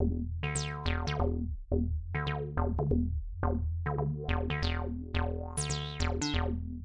Small Sisters RetroBass
140bpm, E, loop, Mixolydian, synth